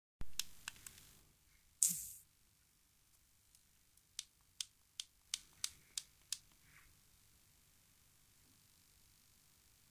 The sound you hear wen you turn on the hair stretcher